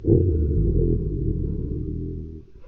Created entirely in cool edit in response to friendly dragon post using my voice a cat and some processing.
voice, animal, dragon, cat, processed